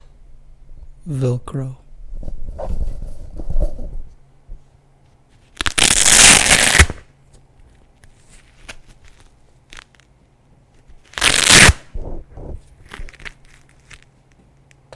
Peeling velcro from my sandal a couple times. Recorded with a condenser mic.
velcro, rip, tear, peeling